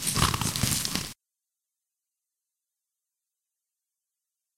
eaten paper
short and little effected sound of crunched paper
crunchy, folding, paper